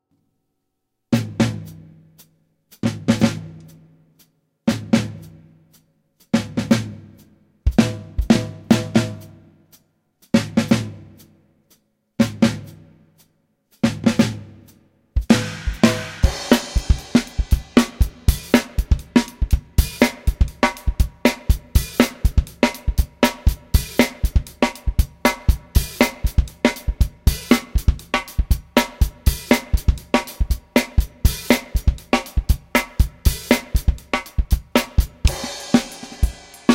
bateria alfil 2
Progressive Heavy Rock. It is my drumset (Pearl) recorded with a multi-track soundcard with different mics, AKG112, Shure57..., small hardwalled room. Processed with Logic Pro 8 —I added some Reverb—.
ride, drums, pearl, plates, progressive